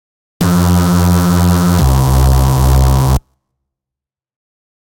This Foley sample was recorded with a Zoom H4n, edited in Ableton Live 9 and Mastered in Studio One.
noise, distortion, distorted, effect, modulation, synthesis, sfx, fx